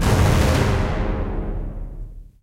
CLUSTER DIMINUENDO - 2
braams, cinematic, orchestral